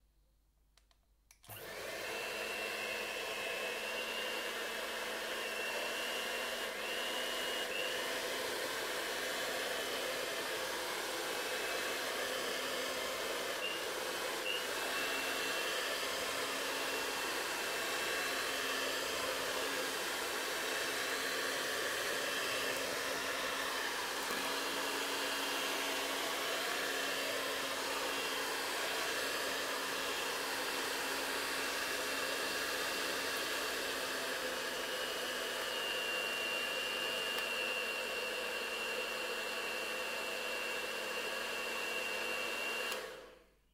FOODCook Mixing Batter With An Electric Hand Mixer 01 JOSH OWI 3RD YEAR SFX PACK Scarlett 18i20, Samson C01
using a hand mixer to (over) mix muffin batter
bake, baking, batter, cooking, electric-mixer, hand-mixer, kitchen, mix, mixer, mixing, muffin, OWI